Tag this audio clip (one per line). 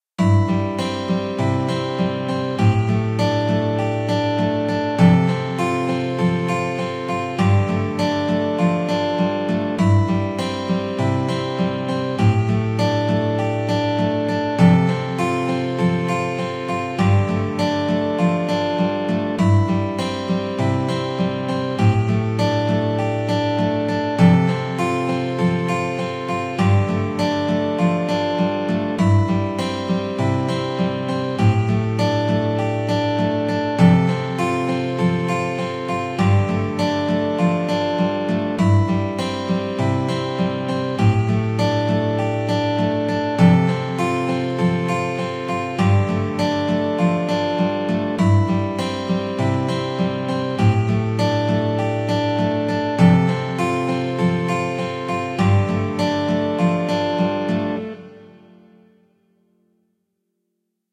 acoustic
background
broadcast
chord
clean
guitar
instrument
instrumental
interlude
intro
jingle
loop
melody
mix
movie
music
nylon-guitar
pattern
piano
podcast
radio
radioplay
sample
send
sound
stereo
strings
trailer